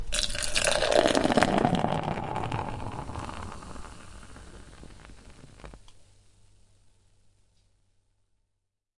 Pouring beer from a can into a glass with fizzy foam
liquid, fuzz